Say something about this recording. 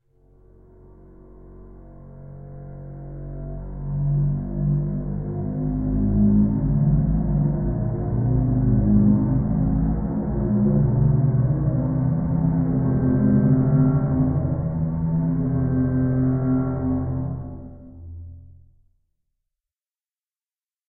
A violin pitched down and effected